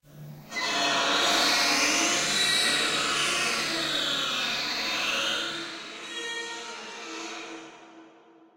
Rusty Spring
Heavily processed VST synth sounds using various phasers, reverbs and filters.